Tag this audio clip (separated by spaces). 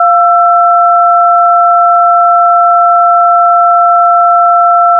ouch Ear earbleed